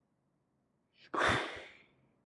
Jumping into water.